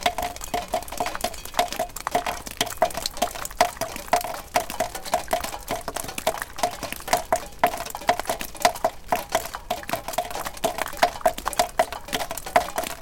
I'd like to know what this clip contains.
snow-melt on a shed roof, drips from gutter falling onto old rusting car parts.
recorded at kyrkö mosse, an old car graveyard in the forest, near ryd, sweden
drip, drips, field-recording, metal, rhythm, rhythmic, water